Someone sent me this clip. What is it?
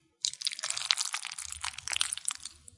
stroganoff-short 7
Sound of some stroganoff being stirred around.
mash, mix, slime, squelch, stir